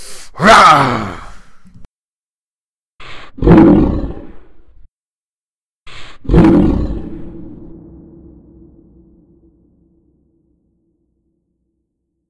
Beast Roar from raw to finish
Full Evolution from raw to finish
zombie, roar, creature, creatures, monster, beast, horror, snarl, animal, scary, growl, growls